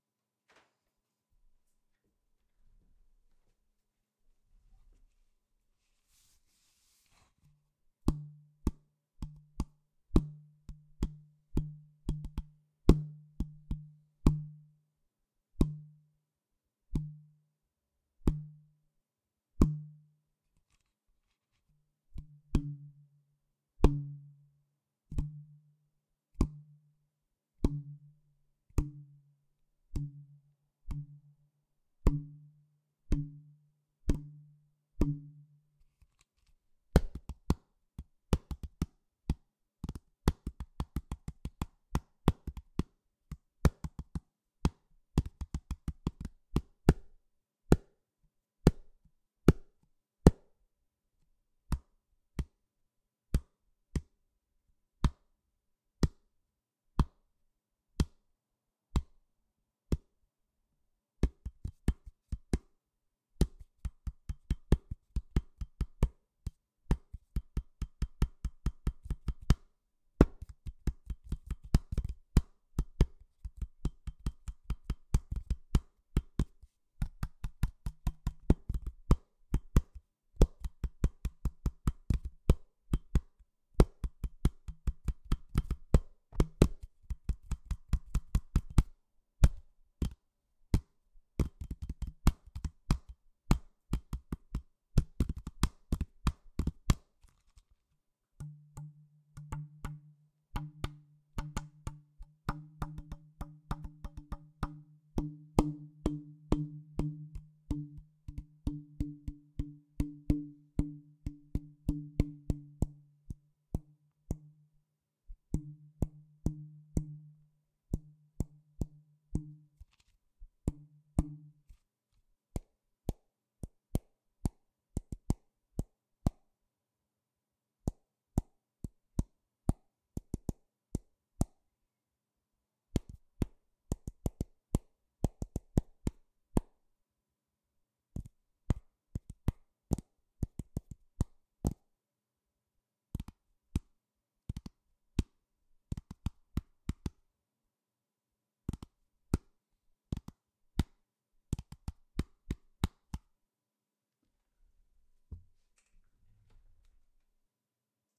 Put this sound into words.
Soda Bottle 001
Mucking about with an empty 500ml plastic soda bottle of well known brand. I have long been intrigued by the breadth of sounds available, and decided to record a little. I AM NOT A DRUMMER, so don't expect any usable loops. While I took hardly any care, the recording seems clean enough and the strikes separate enough to sample. Some EQ and processing will go a long way; better still get inspired and record your own empty bottle!
drum, percussion, soda-bottle